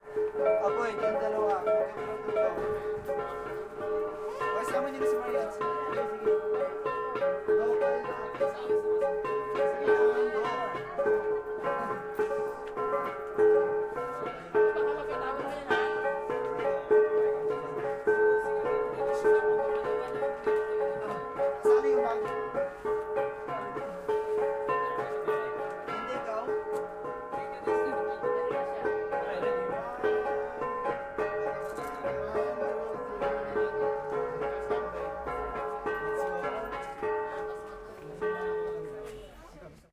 LS 32773 PH EthnicMusic
Traditional music performed by Igorot people.
I recorded this audio file in March 2015, in Tam-awan Village (Baguio, Philippines), while people from Igorot tribe were playing traditional music.
Recorder : Olympus LS-3.
Philippines, music, tribe, native, Field-recording, percussion, Tam-awan, Ifugao, traditional, Igorot, drum, gong, gongs, ethnic, tribal, drums, instruments, Baguio